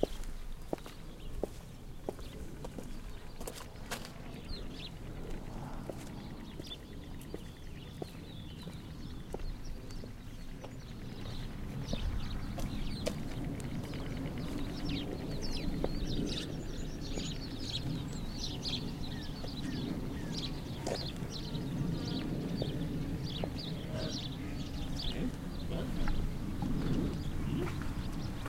footsteps on concrete. Bird calls and roar of a distant car in background